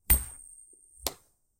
FDP - Coin Flip
Coin, Sound, Money